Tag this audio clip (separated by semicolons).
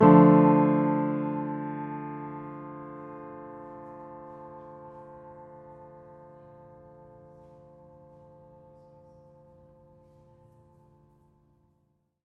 chords; keys; piano